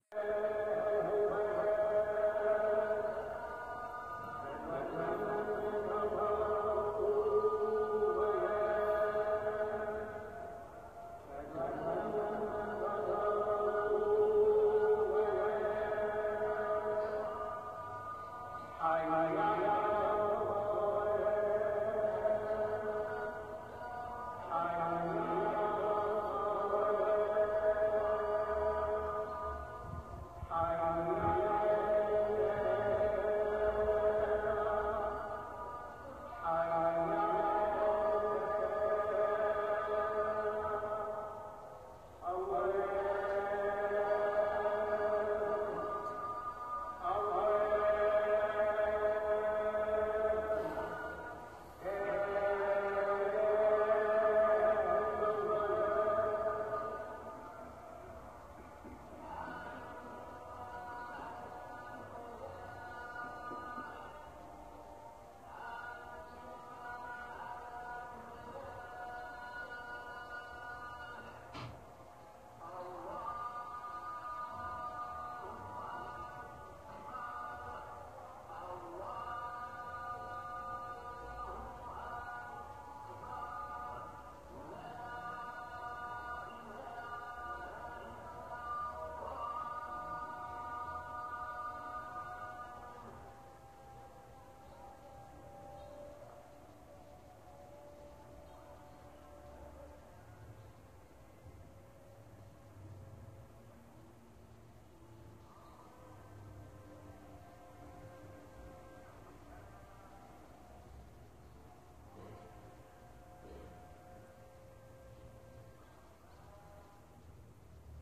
Marrakech Call to lunchtime prayer
The call to prayer from several mosques in the Marrakech medini. This is the call to prayers at around 12.30 on Thursday 16th December 2015. It was recorded using the ASR Android application on a Sony Xperia E6653 mobile phone. I was located on the roof terrace of Riad Dar Zaman in Marrakech Medina.